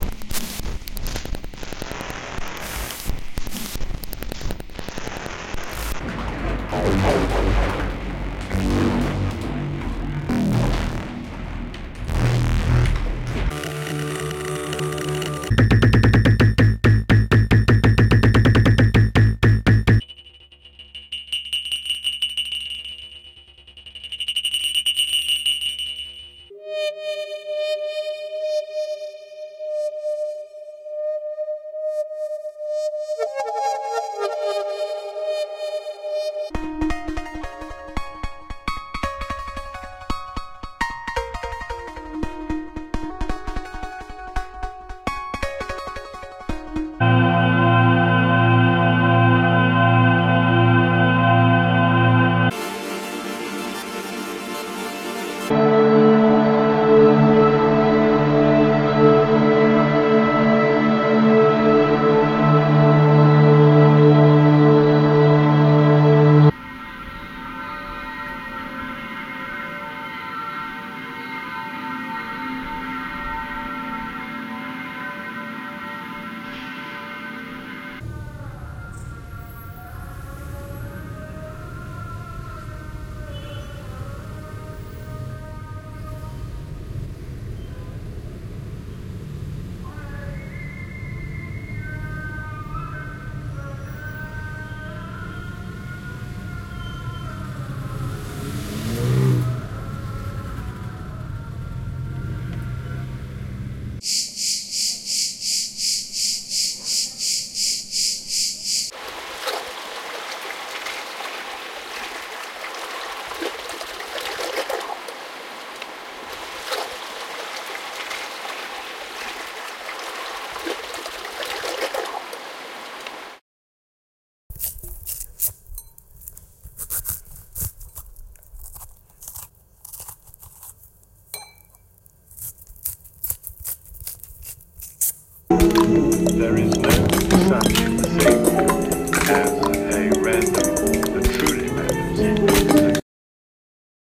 Bana Haffar Morphagene Reel
Created and formatted for the Make Noise Morphagene, this Reel is divided into three sections with like sounds clustered together for ease of navigation.
The first group of splices are glitchy, percussive, experimental, modular snippets. The middle third consists of melodic and chordal washes. The final cluster is a series of field recordings taken from a recent trip to Beirut, Lebanon, with a cut up inspired splice at the very end.
Collectively, I hope this sonic material will inspire the user to mangle, manipulate, and deconstruct to taste.
mgreel, percussive, beirut, cutup